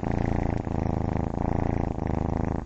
Close mic loop on my cats purr box.